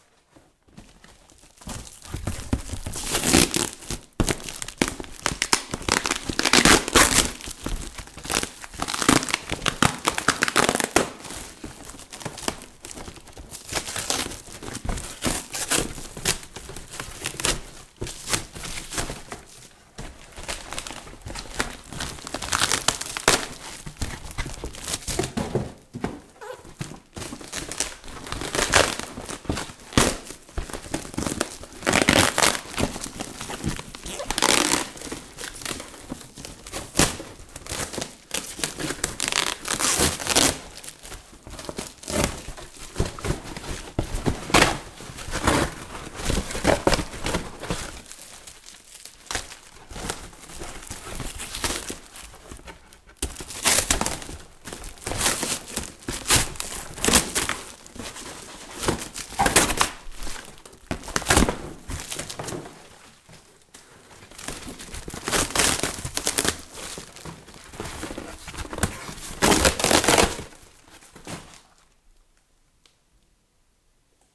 unwrapping parcel
Opening a parcel, sounds of parcel tape and tearing cardboard
parcel, sellotape, tape, unwrap, unwrapping